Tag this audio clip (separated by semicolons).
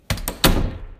latch; closing; doorknob; door